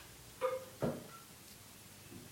Fluorescent lightbulbs turning on.
fluorescent, lighting